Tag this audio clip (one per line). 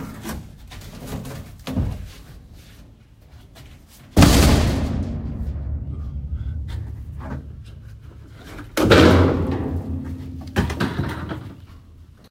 bang
boom
dumpster